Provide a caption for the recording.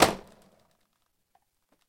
Beercrate being moved